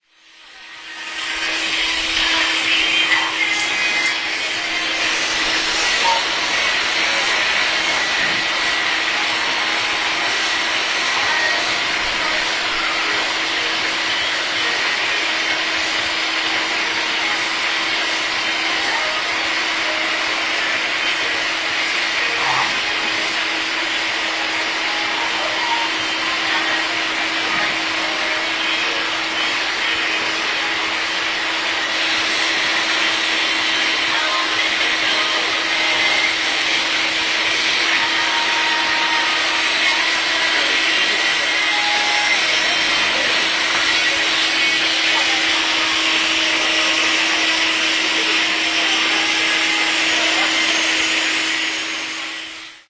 hoover in h&m021210
02.12.10: about 19.40. H&M store in Stary Browar commercial center. the hoover noise (the guy was hoovering melted snow lying down in the entrance).
drone, field-recording, h, hoover, m, noise, poland, poznan, store